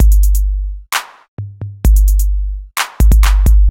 130 super vintage drums 01
old school vintage drum
club, drums, free, phat, vintage